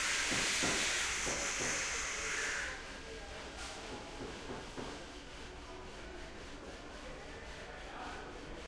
080909 02 grinder metal
grinder over metal hardware